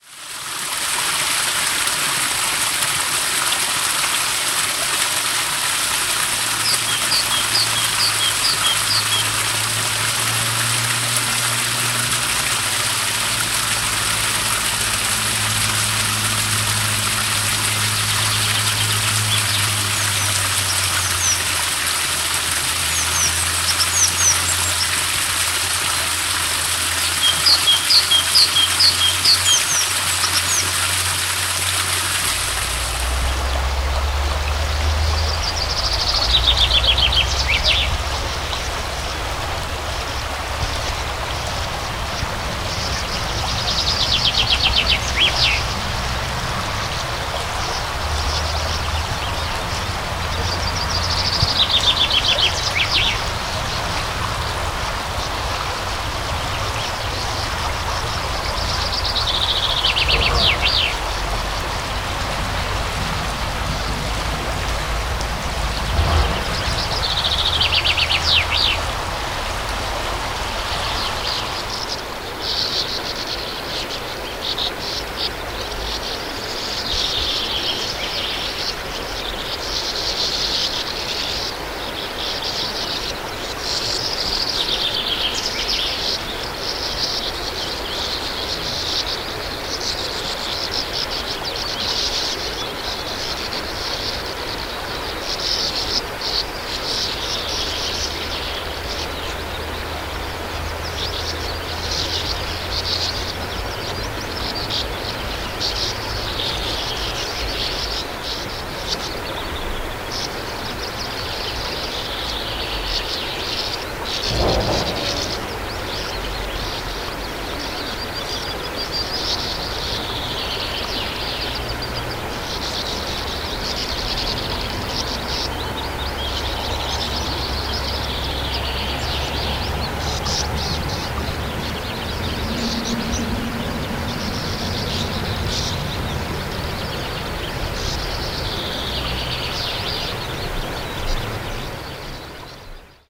04.River-Nevis-Banks
Sound recording on the banks of river Nevis. Water and singing chaffinch.